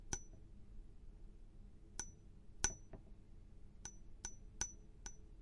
Metal sound 7 (flicking random bar)
A metal bar being flicked by a finger.
foley, Metal, metalfx, metal-sound, sound, soundfx